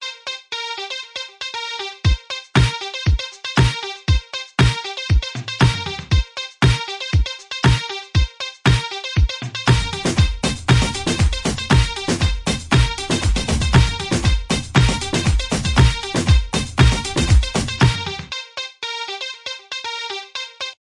rock in roll 3
free, music, rock, song